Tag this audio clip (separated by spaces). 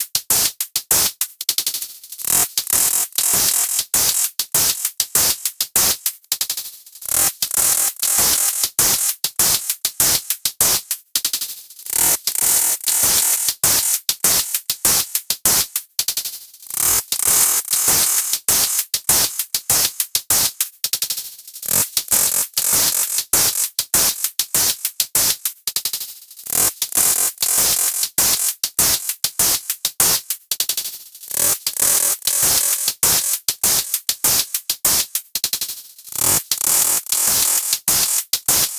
120BPM rhythmic electro electronic